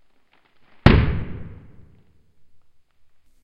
This sound was made by popping a cellophane bag and recorded using an M-Audio Microtrack II. This sounds really good as a firework or an explosion sound, especially with reverb.
bang, bomb, explosion, firework, grenade, loud, pop